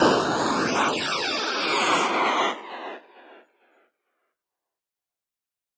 Whoosh 1 Flange
Radio Imaging Element
Sound Design Studio for Animation, GroundBIRD, Sheffield.
sfx, wipe, imaging, splitter, processed, kick, bumper, oneshot, sting, bed, noisy, radio